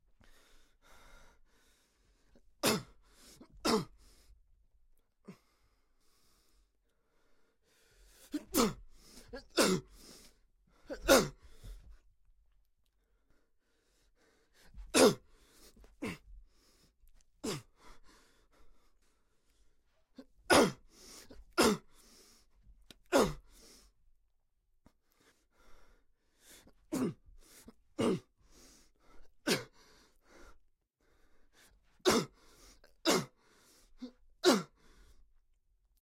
Fight Reaction Kick Sequence
Male 20 yo REACTIONs / Kick Sequence 2 and 3 kicks
fight, attack, Kick, agression, reaction